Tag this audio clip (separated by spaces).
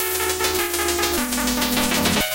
techno dance tunes dub-step club loop minimal dub house delay 102 trance electro acid rave bpm